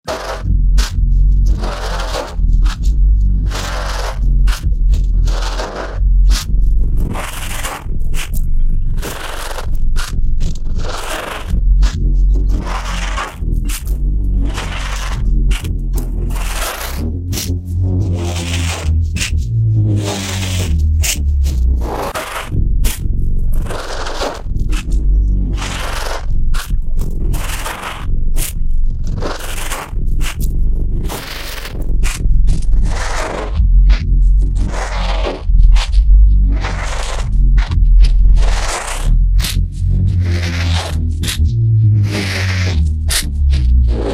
Morph Neuro Bass
Generic bass processed with foley using Zynaptiq Morph 2, and then resampled and sent through that again, but with a timestretched version of itself.